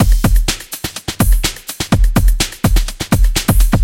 2 bar, 125bpm drum loop